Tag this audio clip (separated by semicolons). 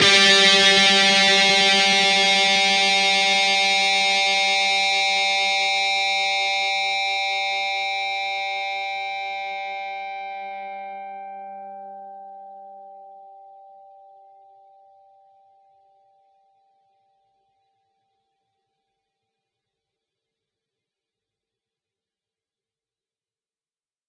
chords; lead; lead-guitar; distortion; distorted; guitar-chords; guitar; distorted-guitar